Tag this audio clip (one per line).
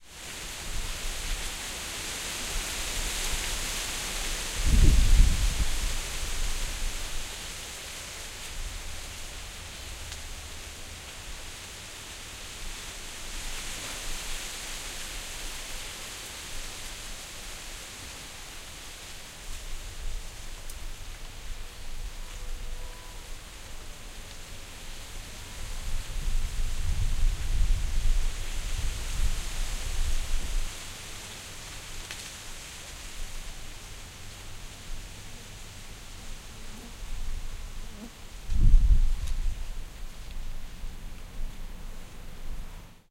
wind
trees
breeze
gentle